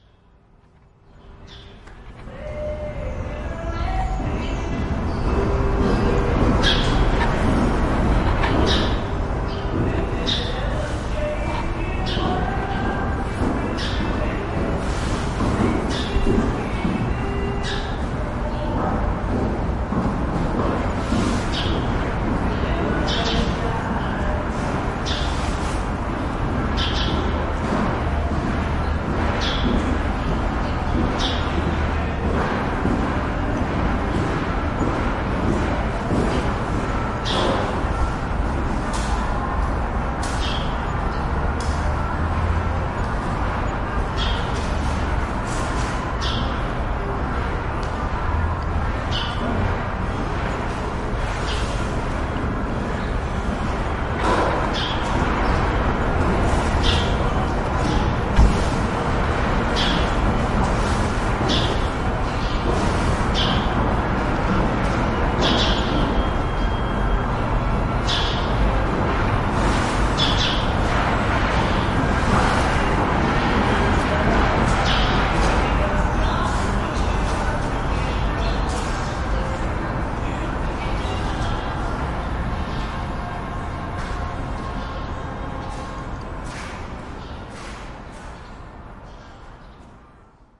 ohio city
sound if birds and a radio at a train station
ambiance, birds, field-recording